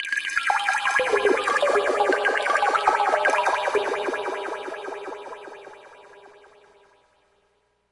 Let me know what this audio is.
A chorused, reverbed and mod delayed sound I made on my Korg Electribe SX. the original sound was a synth patch